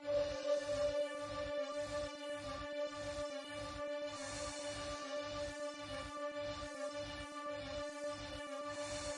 A simple tune which is different but catchy.
- recorded and developed August 2016.
techno, drum-bass, game-tune, glitch-hop, beat, Bling-Thing, drum, blippy, dub, electro, experimental, bounce, intro, dub-step, hypo, effect, game, loopmusic, club, ambient, loop, rave, dance, waawaa, synth, gaming, trance, electronic, bass